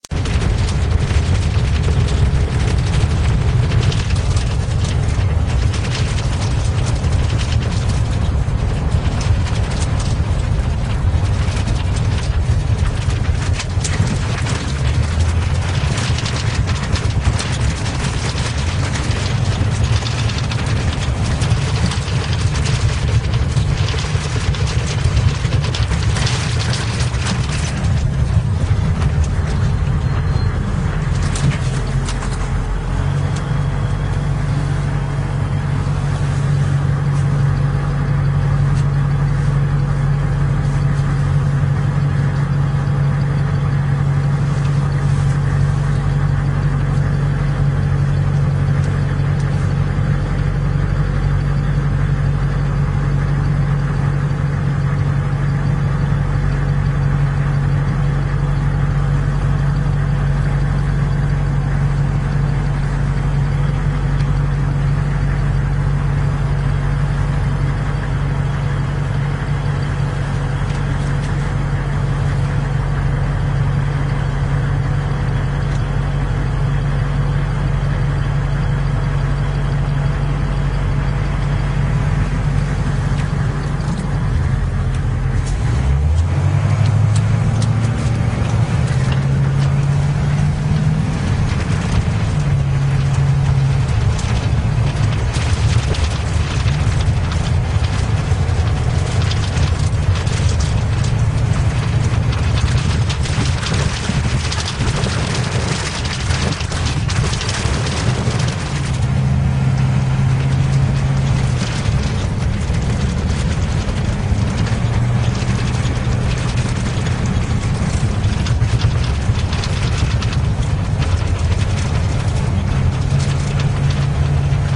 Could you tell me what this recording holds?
Humvee - Truck Driving Inside Convoy Assault Ops (P1U5o3hkt1o)
Driving in a Humvee.